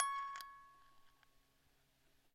7th In chromatic order.